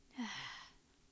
sigh girl sighing
girl, sigh, sighing